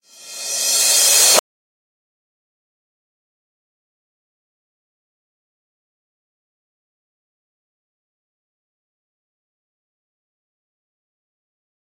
Reverse Cymbals
Digital Zero